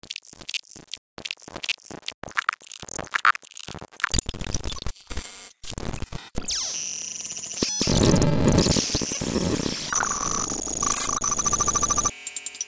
Computer Gibberish 2
Not sure how I can go about describing this sound. Sound was created through stretching of sounds & loops I have created.
Parts of it sound computerish to me but use it how you want.
This sound or sounds was created through the help of VST's, time shifting, parametric EQ, cutting, sampling, layering and many other methods of sound manipulation.